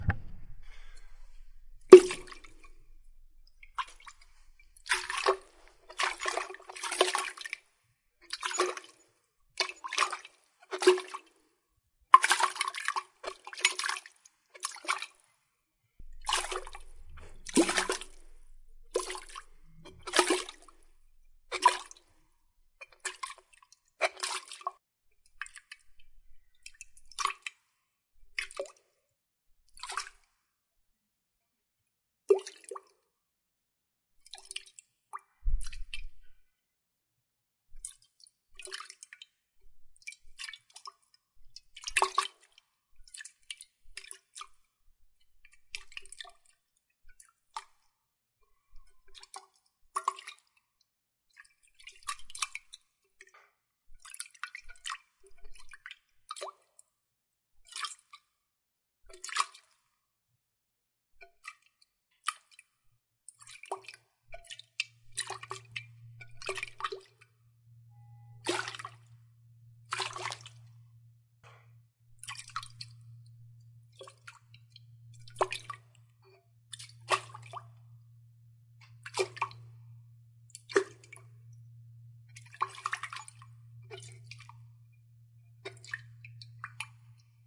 water splashing
Water sloshing around in a glass...a big glass...ok it's a vase that I drink out of.
glass, sloshing, spilling, vase, water